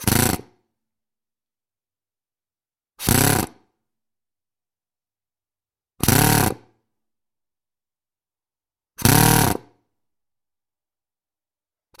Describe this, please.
Pneumatic drill - Pluto hb 25r - Start 4
Pluto hb 25r pneumatic drill started four times.
fat, drill